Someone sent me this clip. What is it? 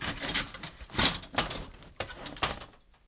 Rummaging through objects